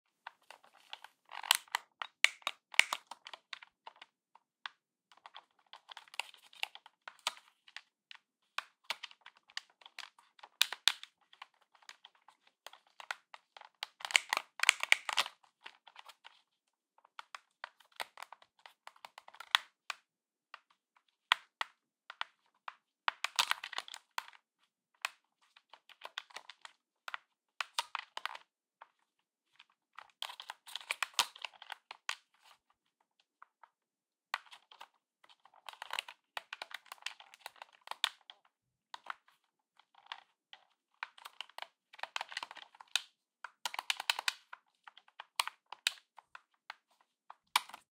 Recording of me playing video games on my xbox controller.